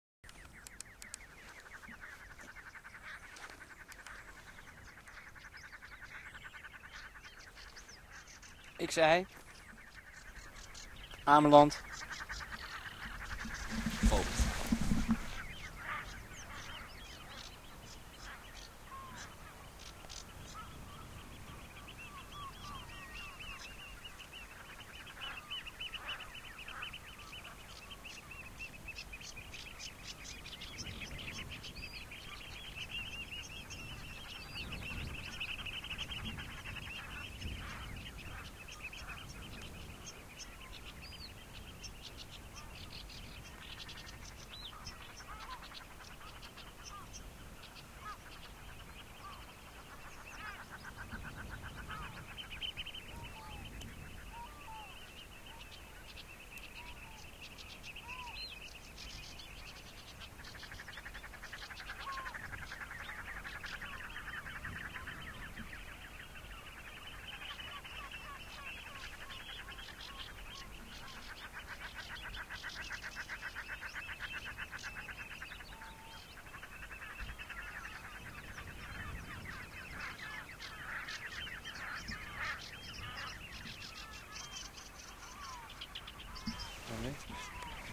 XY recording ( Aaton Cantar X, Neumann 191 ) of some birds on Ameland, a Dutch isle.